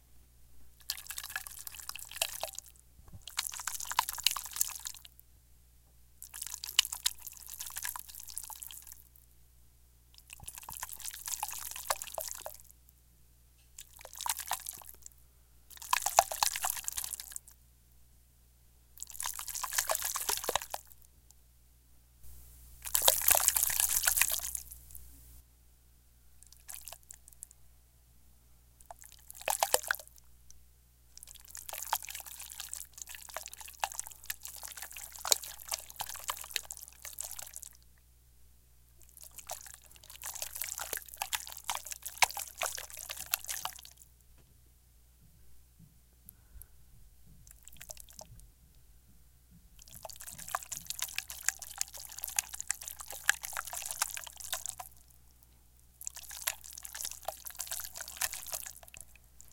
Jello Squish
Bowl of Jello being sloshed around. Raw audio recorded with Audio-Technica AT2035. No effects added.
squelch, water, mush, wet, slosh, liquid, shake, food, spurt, jello, squish, gross, gelatin, stir, squash, slimy, goo, mushy, splat